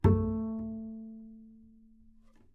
Double Bass - A3 - pizzicato
Part of the Good-sounds dataset of monophonic instrumental sounds.
instrument::double bass
note::A
octave::3
midi note::57
good-sounds-id::8741
A3, double-bass, good-sounds, multisample, neumann-U87, pizzicato, single-note